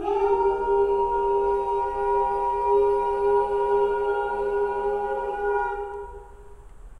Kirkstall Roomy Choir.2
One, midi, ambiance, recording, alive